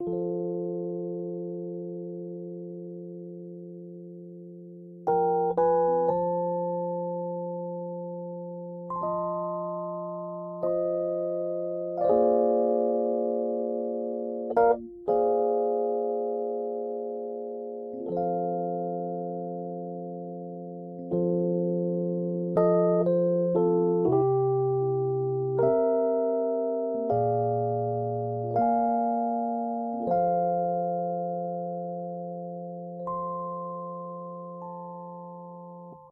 Song6 RHODES Fa 3:4 120bpms
120, beat, blues, bpm, Chord, Fa, HearHear, loop, Rhodes, rythm